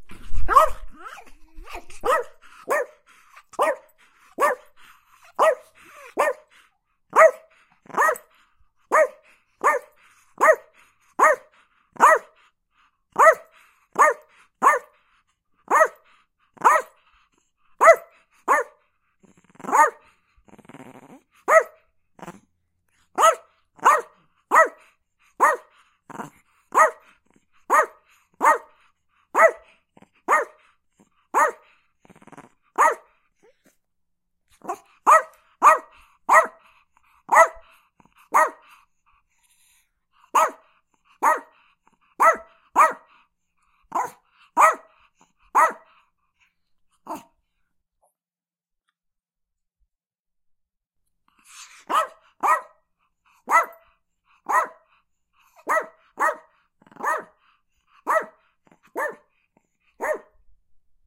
Chihuahua Barking
A wheezy old Yorkie/Chihuahua named Larry barking over and over and over again
yorkshire-terrier, yip, wheeze, incessant, nonstop, dog-barking, yorkie, chihuahua, dog, yap, barking